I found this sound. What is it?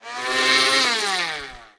Sound of belnder recorded in studio.